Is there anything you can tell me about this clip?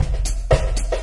Cool beat 1 fx
A drum loop. Backbeat hihat. 22 inch Gretsch kick, 10 inch Tamburo snare. Effects added.
snare, loop, drum, big, backbeat, hihat, kick, small, effects